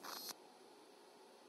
Electric welding with tig - End
Wameta TIG 1600 stopped.